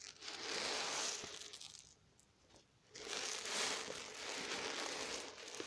agua movimiento fx sound effect